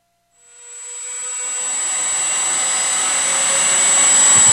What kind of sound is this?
short circuit09
This sounds similar to a scream processed with granular synthesis, but it is simply some noise processed through a Yamaha SPX90 reverb set to reverse. The noise was created with the Nord Modular synth using FM and sync feedback.
digital, fm, glitch, granular, modular, modulation, noise, nord, reverb, reverse, scream, spx90, synth